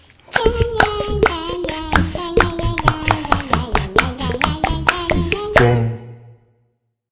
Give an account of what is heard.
mouth music with ethnic ryhthm
mouth-music, african, beat, music, rhythm, ethnic, beatbox, rap